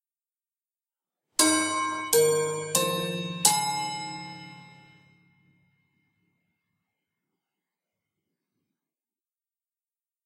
Westminster Quarters, Part 5 of 5
Plastic pen striking sequence of four rods from this set of grandfather clock chimes:
Roughly corresponds to D#4, A#4, C5, G#4 in scientific pitch notation, which is a key-shifted rendition of the fifth grouping from the Westminster Quarters:
big-ben, grandfather, westminster-chimes, westminster, music, chimes, tune, hour, clockwork, clock, time, westminster-quarters, chiming, cambridge-quarters, strike, chime, grandfather-clock